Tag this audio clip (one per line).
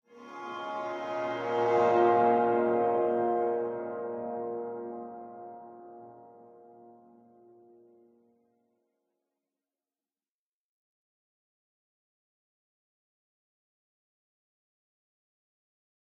bow
bowed
chord
electric
guitar
huge
orchestral
reverb
soundscape
spacey
string
violin